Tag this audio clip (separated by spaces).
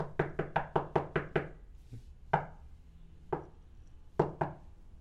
hard hit knock wood